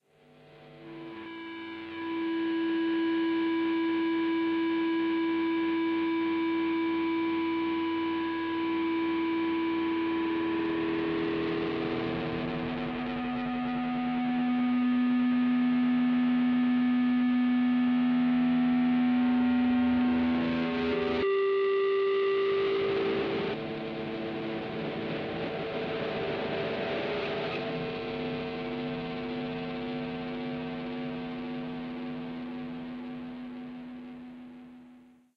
Guitar Feedback using a boss me-25 effects pedal which has been reversed